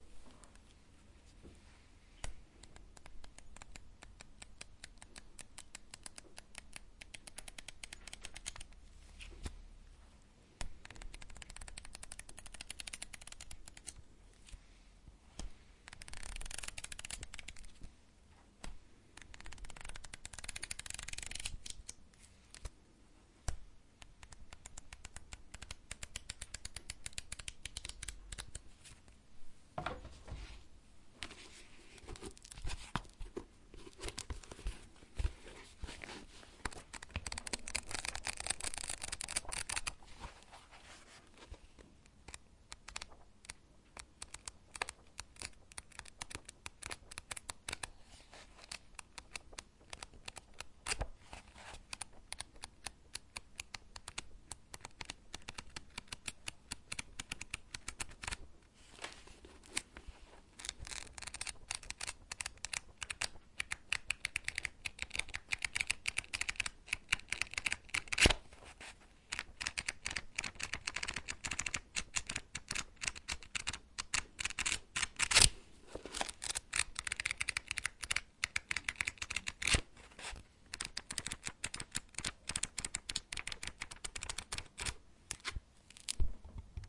flipping cards
I needed to record calendar page flip, so i decided to upload my first sound here :) Recorded with TASCAM DR-40
book, calendar, card, cards, flip, flipping, page, paper, shuffle